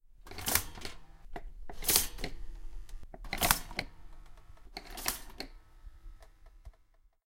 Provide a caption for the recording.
Toaster Start, A
Raw audio of starting a toaster recorded from four different perspectives.
An example of how you might credit is by putting this in the description/credits:
The sound was recorded using a "H1 Zoom V2 recorder" on 17th April 2016.
start, toast, toaster, up